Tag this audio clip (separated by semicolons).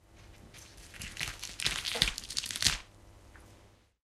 bones; breaking; cauliflower; foley; horror; pulse; vegetable